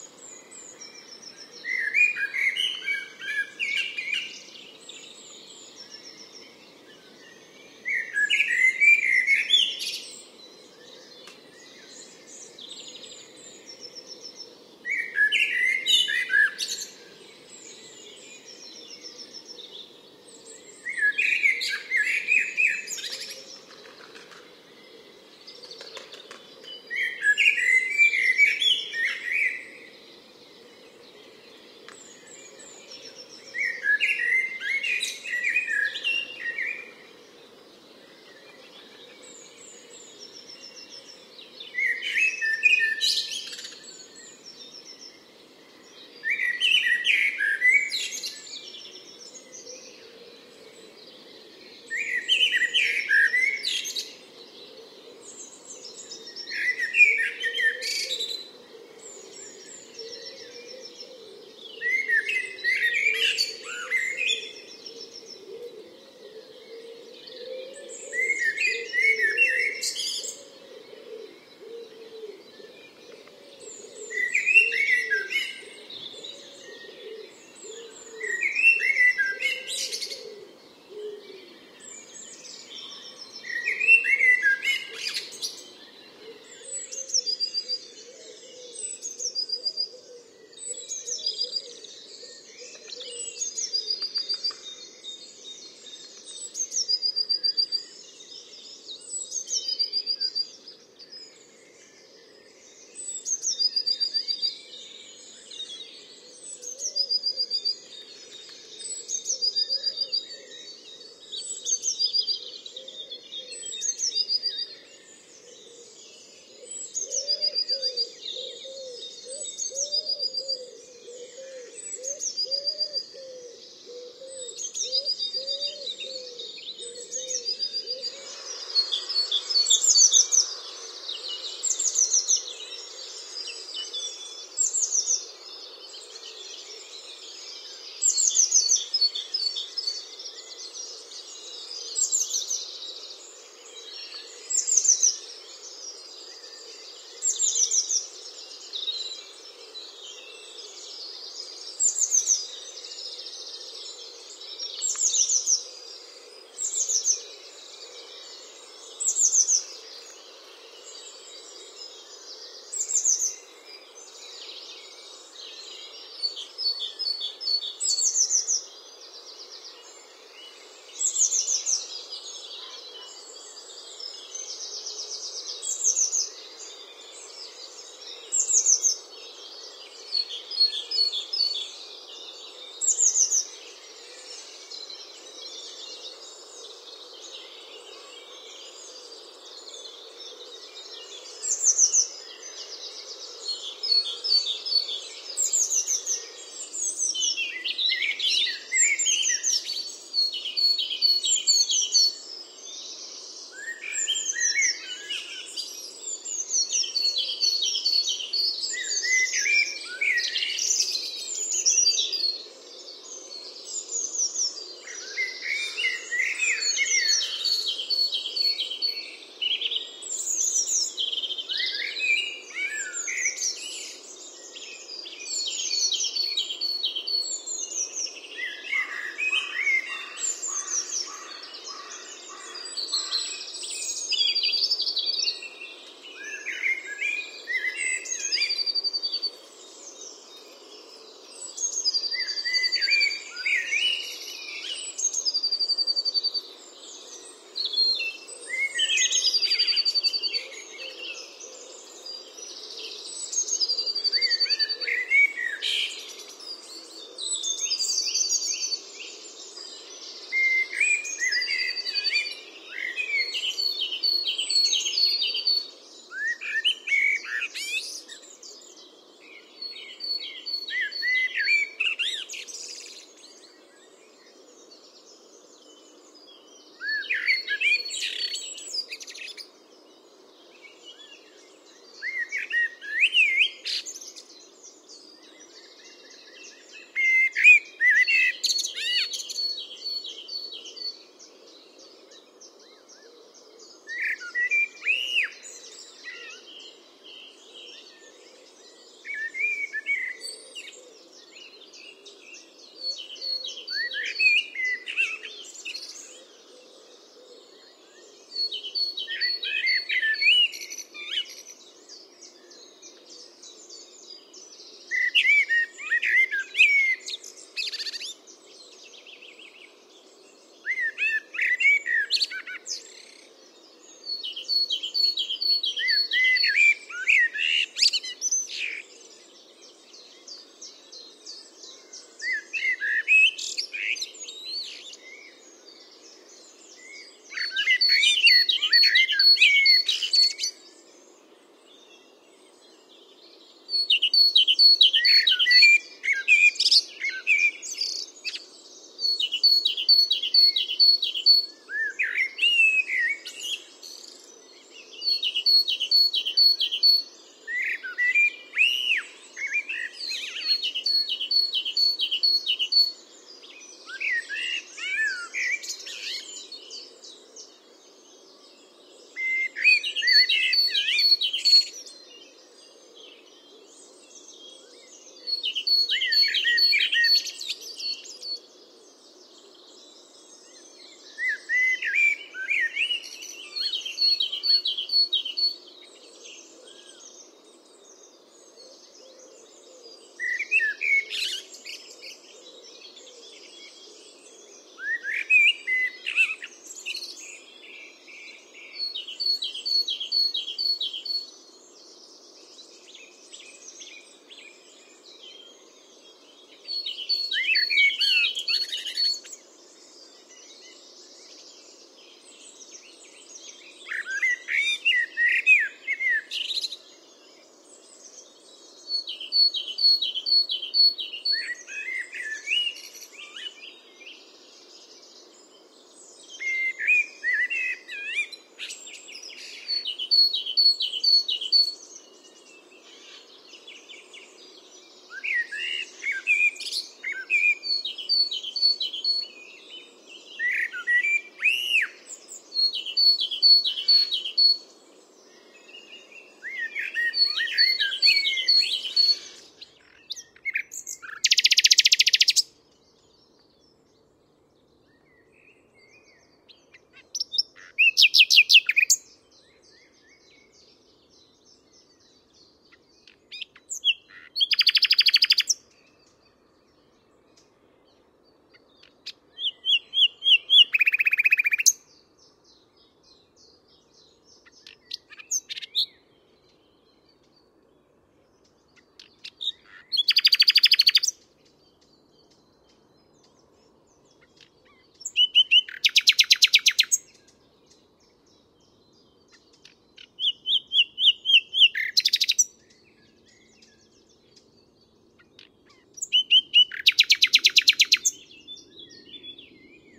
Spring Sound Safari 19/04/2022
This is a sound safari of birds heard during a short walk. The recordings are in the same sequence as encountered. The main birds that can be heard are (with approximate times):
00:00 Blackbird (can also hear blue tit and wood pigeon in the background)
01:27 Blue tit (with great tit and wood pigeon in the background)
02:08 Blue tit and great tit (a pheasant can be heard in this section)
03:16 Great tit, blue tit and blackbird (with crow and song thrush in the background)
04:26 Blackbird (with great tit and chiffchaff in the background)
07:30 Nightingale
The birds were mostly in trees and bushes along the route.
This was recorded with a parabolic microphone and a Zoom F6.
This was a noisy environment on a normal work day. Some reduction in noise has been achieved by applying a high pass filter, though not for the nightingale as this was a good volume and the background noise did not intrude.
birds, nature, field-recording, spring, birdsong, sound-safari